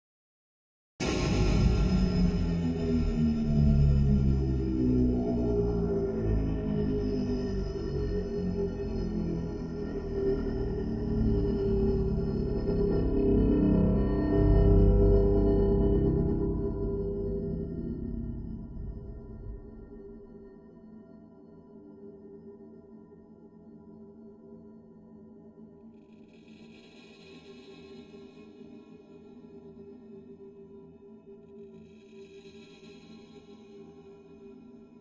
Rubbing Metal - Granular 03
Sound made by hitting a detuned guitar tied to a clothes hanger. Recorded with two contact microphones. No effects were added, the apparent reverb tails are the waves resonating within both the guitar and the metallic clothes hanger. Post processed through granular synthesis.
sound, dark, texture, suspense, design, drone, spooky, ambient, metallic, stereo, atmosphere